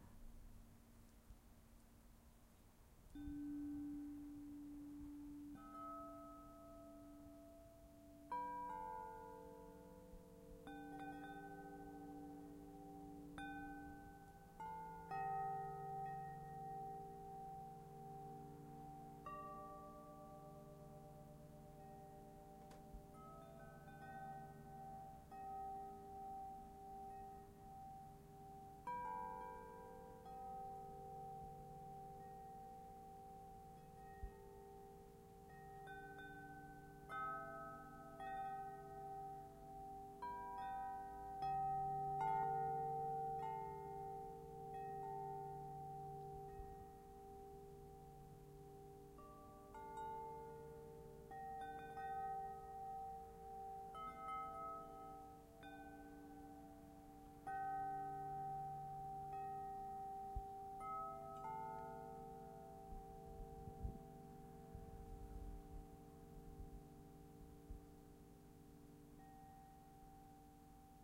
Hi there,
This is a recording of a very big wind chime hanging in my garden.Hope you enjoy it. Recorded with zoom iq6.
wind, tinkle, nature, chimes, windcatcher, wind-chimes, relaxing, chime, field-recording, windchimes, natural-soundscape